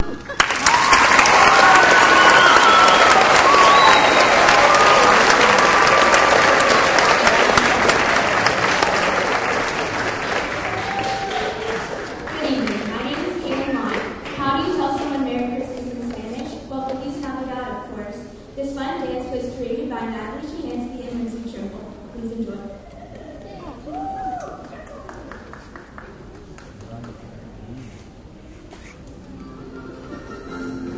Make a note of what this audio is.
raw recital applause decent
Raw unedited sounds of the crowd in a auditorium during a Christmas recital recorded with DS-40. You can edit them and clean them up as needed.
applause, audience, auditorium, crowd